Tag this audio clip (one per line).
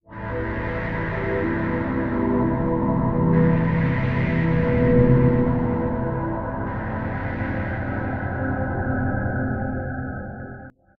ambient
chillout
layered
lounge
pad
sampler
texture